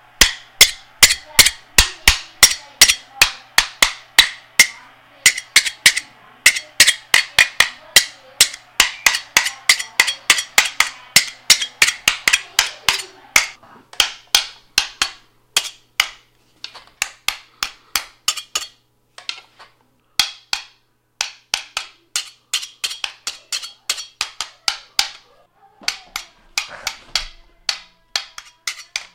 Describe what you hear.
knife rock hit RAW2
Knife hitting a rock
click, knife, rock, scrape